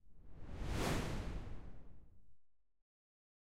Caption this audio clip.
whoosh long mid
A simple whoosh effect. Long and middle-pitched.
air, swoosh, pass-by, gust, whoosh, wind, fly-by, swish, fast